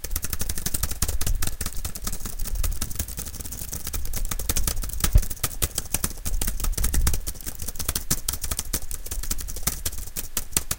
All I did was type "Type" over and over.